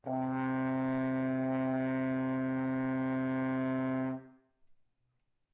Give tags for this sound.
c note french-horn tone horn c3

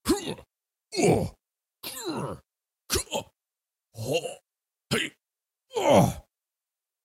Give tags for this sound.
deep fighting hurt male man rpg strong voice

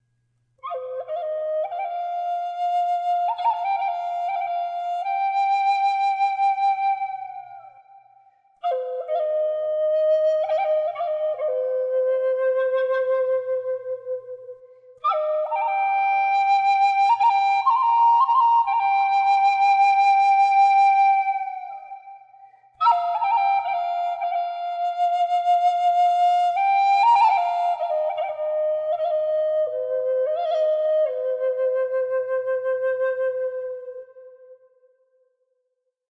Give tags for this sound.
native-american native american ethnic c

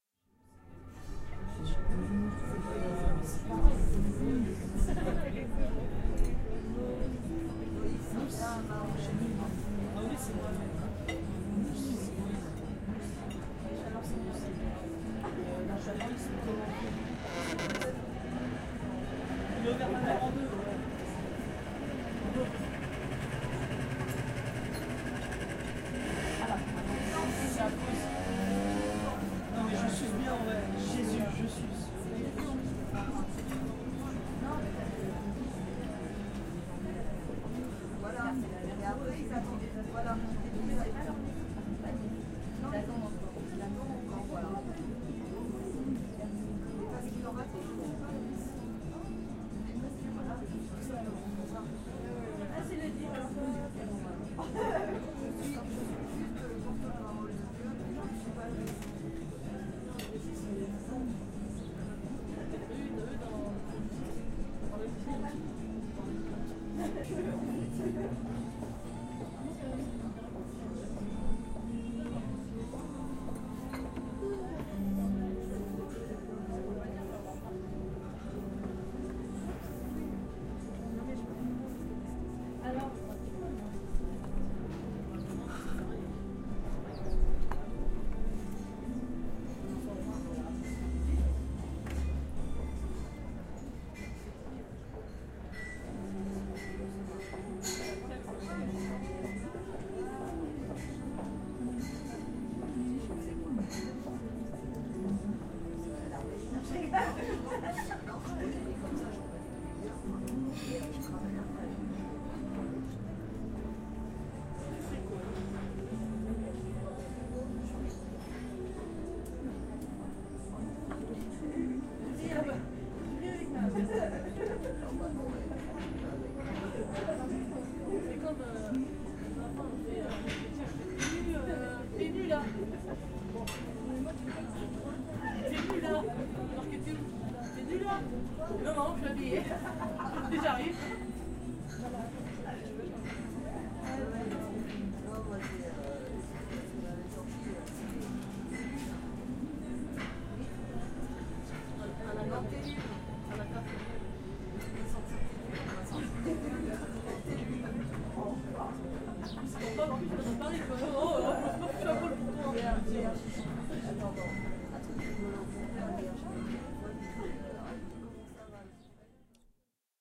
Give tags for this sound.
french recording

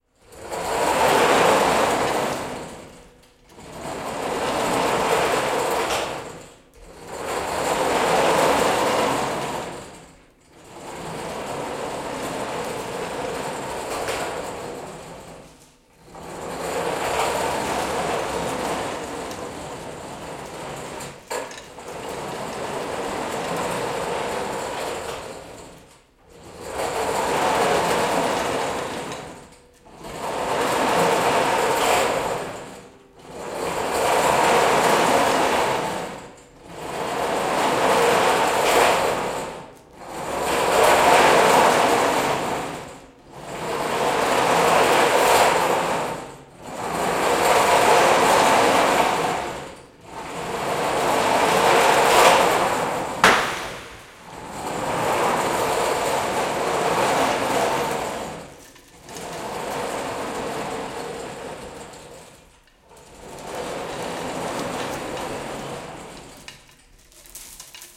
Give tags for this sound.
hoist metal pull rattle shop thick

